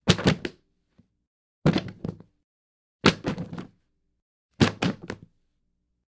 A small stack of books falling off a bench onto the floor. It's been recorded 4 different times in this file.